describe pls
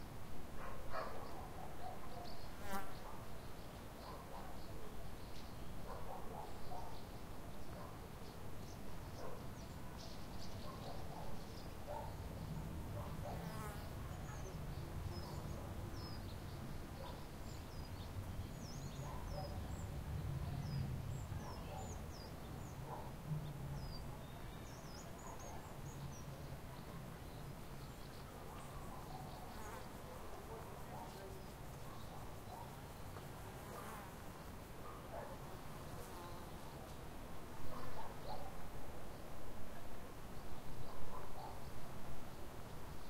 Rupit silent village birds bugs air plain bypass
ambiance, ambience, ambient, atmo, atmosphere, background, background-sound, Barcelona, city, field-recording, memories, noise, people, Rupit, soundscape, Spanish, street, town